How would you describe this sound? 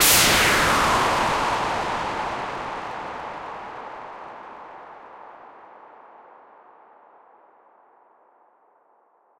Crackin Noise Hit
riser, sound-effect, sweep